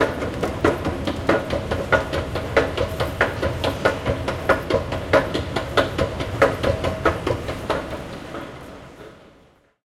This is a funny "musical" escalator that i had found in Paris, France on a local train station.